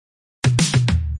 Kygo Style Drum Fill - 102 BPM
I chopped up some loops and made this drum fill at 102 BPM in FL Studio 20.
beat, dance, disco, drum, drum-loop, drums, fill, fx, groovy, kick, kygo, loop, perc, percs, percussion, percussion-loop, pop, snare, tom